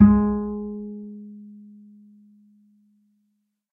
Standup Bass Normal G#3
This standup bass was sampled using a direct pickup as well as stereo overhead mics for some room ambience. Articulations include a normal pizzicato, or finger plucked note; a stopped note as performed with the finger; a stopped note performed Bartok style; and some miscellaneous sound effects: a slide by the hand down the strings, a slap on the strings, and a knock on the wooden body of the bass. Do enjoy; feedback is welcome!
Acoustic, Bass, Double, Instrument, Plucked, Standup, Stereo, Upright